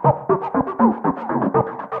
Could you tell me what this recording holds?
Wierd Loop 006e 120bpm
Loops created by cut / copy / splice sections from sounds on the pack Ableton Live 22-Feb-2014.
These are strange loops at 120 bpm. Hopefully someone will find them useful.
120bpm, delay, echo, loop, loopable, rhythmic, seamless-loop, strange, synthesized, synthetic